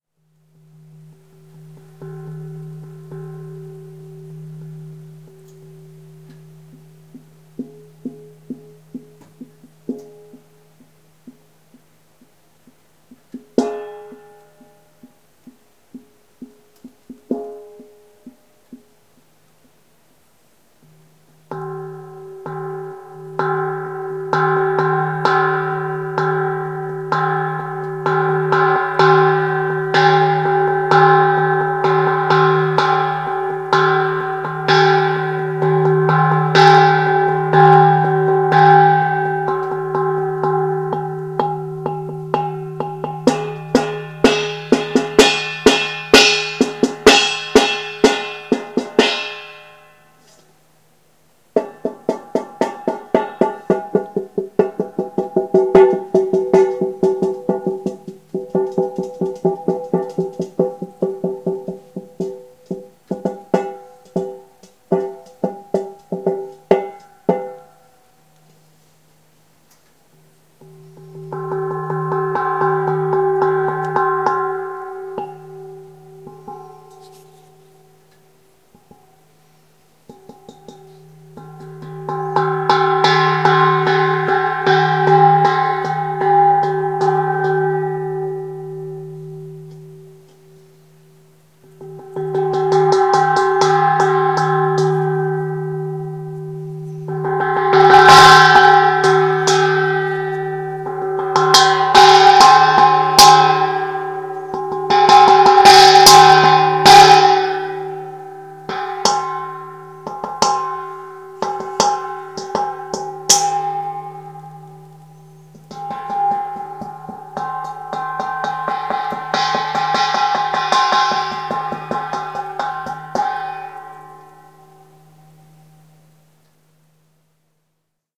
a thiny economical chinese salad bowl tuned in G?
chinese-salad-bowl, g, metal, thiny-iron